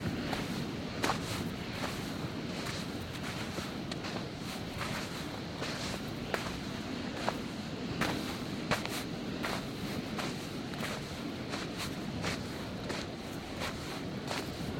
Walking on beach sand
Sneakers walking in deep dry sand at beach. Recorded with Zoom H6 and Rode NTG-2 shotgun microphone.